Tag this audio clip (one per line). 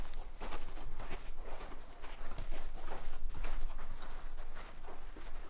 crowd
dirt
walking